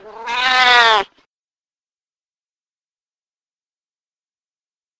Siamese cat meow 9
animals, cat, meow, siamese